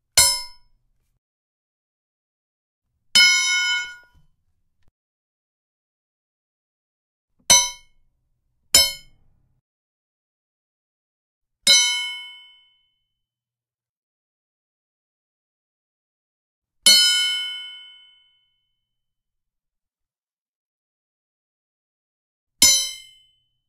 crowbar hits with zing
crowbar, hits, zing